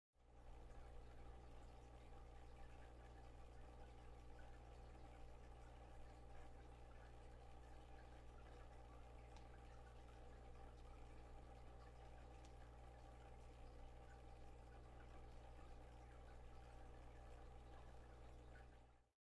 Fish-tank Filter;buzzing
The sound of a fishtank aquarium filter bubbling and buzzing.
gurgle, bubbly, filter, aquarium, fishtank, bubbling, submerged, under-water, tank, bubble, fish, bubbles, machine, water